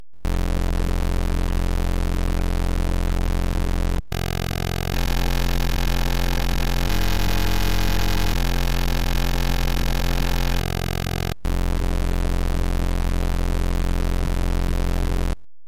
A glitchy electronic sound made from raw data in Audacity!